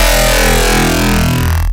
FM bass Decrecendo 140 bpm
An FM bass that you hear in most modern electronic music.
short, electro, suspense